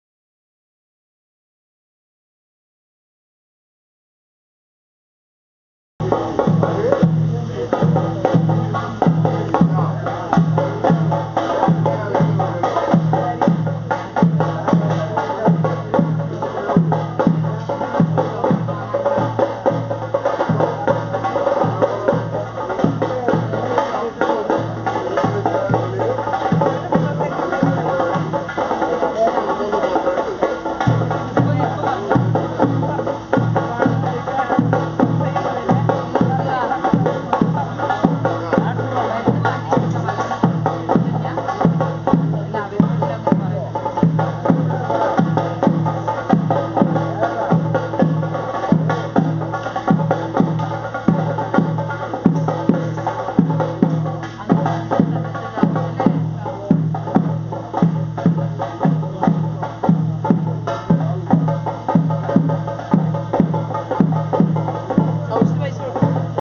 2 Theyam dancer prepares
Drumming as the dancer is prepared